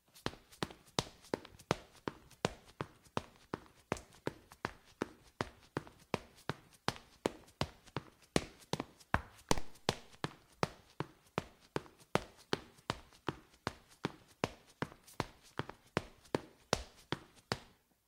Tennis shoes on tile, running